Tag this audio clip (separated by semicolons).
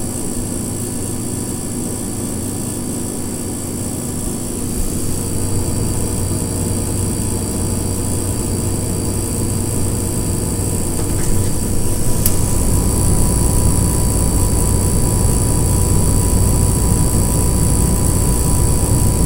motor; electric; fridge; machinery; machine; buzz; humming